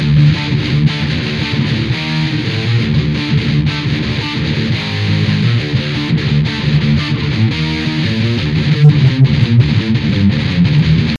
metal guitar phrase